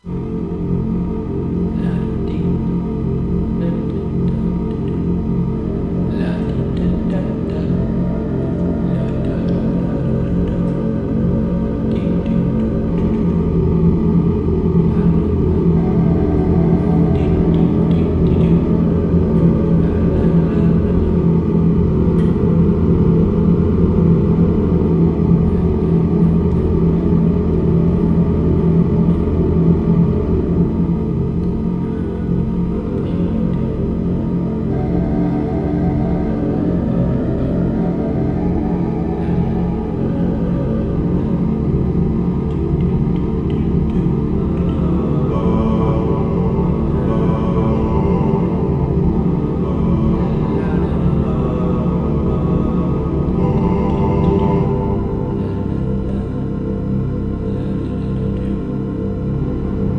insanity sample
evil keyboard sounds with man humming random tunes lightly.